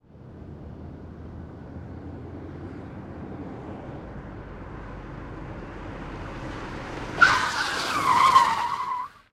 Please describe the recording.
AE0092 Volvo 740 GLE handbrake turn 03
The sound of a car approaching then performing a handbrake/e-brake turn. The car is an early 90s 4 cylinder Volvo 740 GLE estate/station wagon.